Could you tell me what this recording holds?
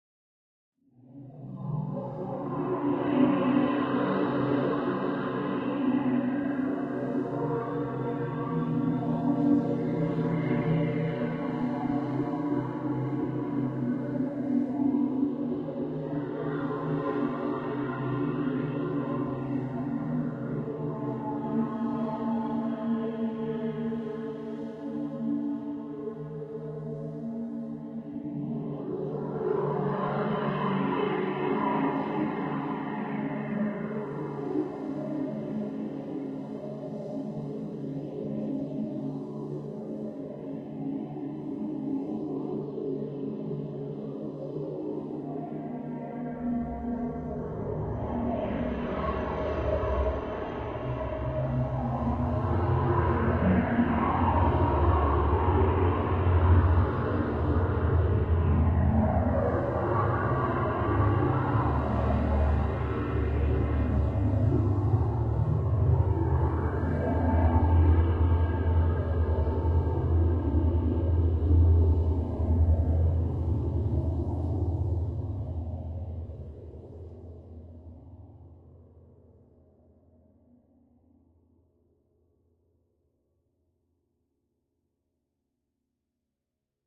Darkness Of the mind
Sounds made Using virtual instruments. This makes the sound alot sharper to hear and makes many instruments sound clearer than other instruments you can't hear before.
Room, Residential, City, Street, Indoors, Industrial, Tone, Road, Pass, Traffic, Distant